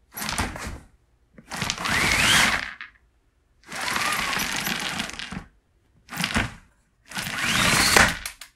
Roller Shade various
Ikea roller shade being pulled down at various lengths and then quickly rolling up. Mono.